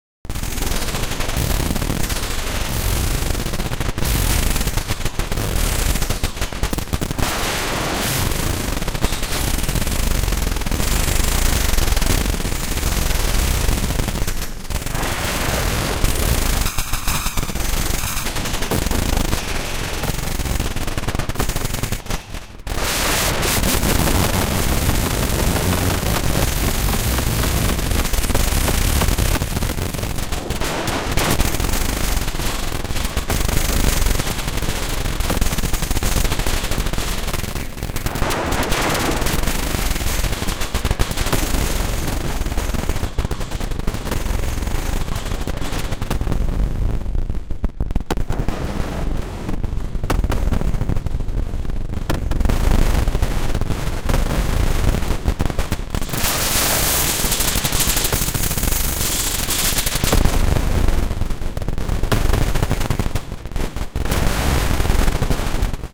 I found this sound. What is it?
Spoke Spinna 03
Da spoke, da spins, da storted. Field recording of a bike tire spinning, ran through several different custom distortions.